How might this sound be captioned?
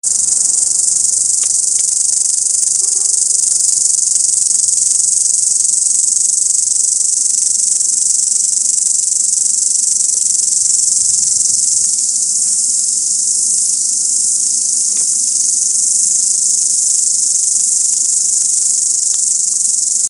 locusts making noise in Waimangu (New Zealand)